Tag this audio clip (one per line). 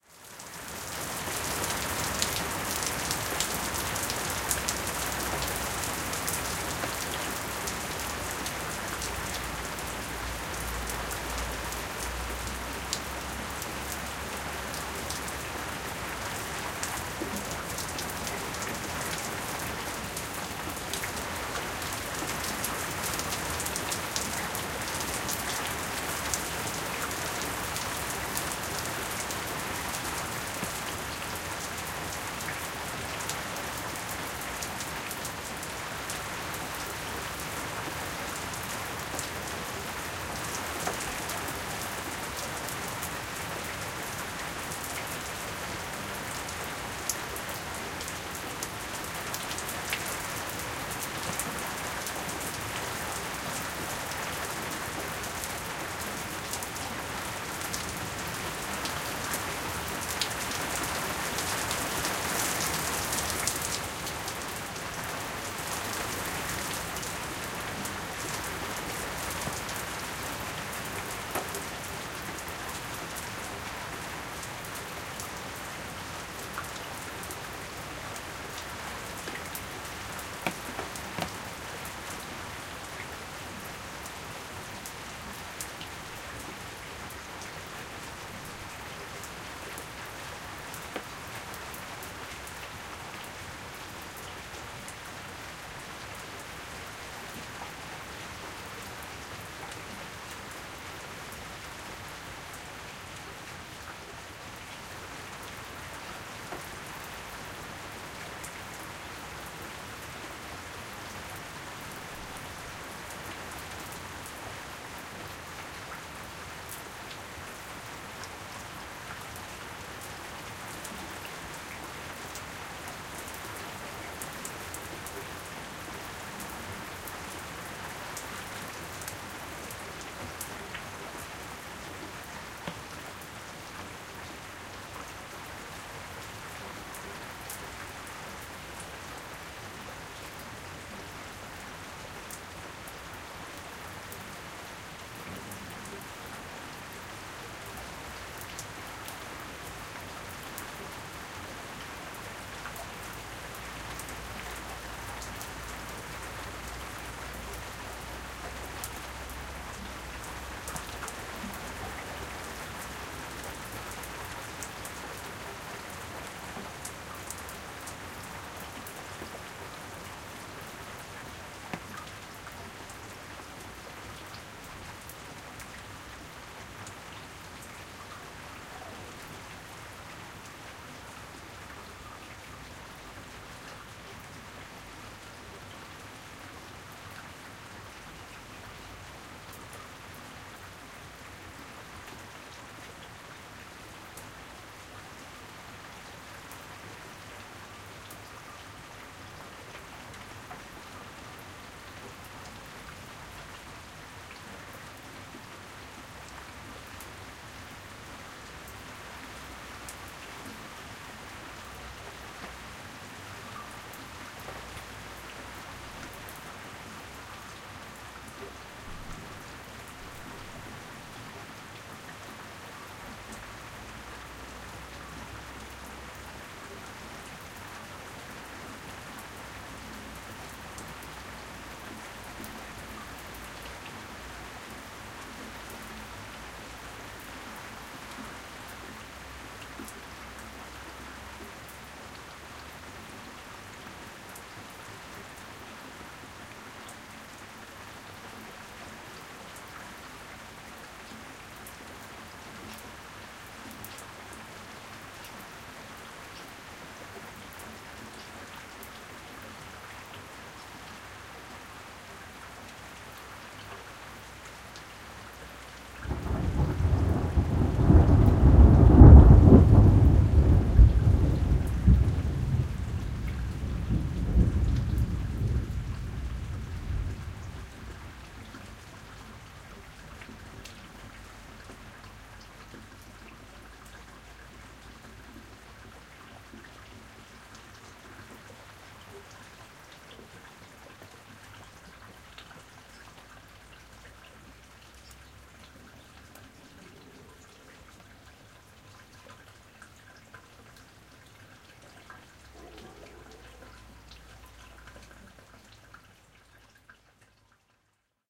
field-recording
precipitation
rain
stereo
thunder
water
xy